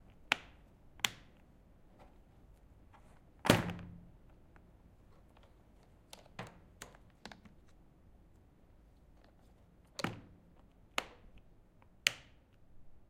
Recorded using a Zoom H6. Sound made using a briefcase filled with papers. The case is opened, closed and locked.
Briefcase, Business, Busy, Case, Closing, Leather, Lock, Movement, Opening, OWI, Squeak, Unlock